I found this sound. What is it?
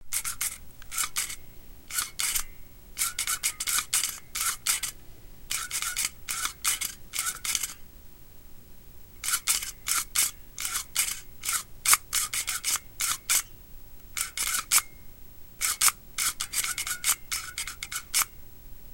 slot cars07
The sound of the hand-held controller for small electric toy cars. In the shape of a plunger, there is a spring inside and some copper strips that slide along a wire resistor. It is operated using the thumb.
During a "race" the controller was in almost constant motion, except for long straight-a-ways where it was fully depressed.
Recorded using an M-Audio Micro-Track with the stock "T" stereo mic held about 6" above the center of the oval.
ho, 1960s, scale, electric, slot-car, toy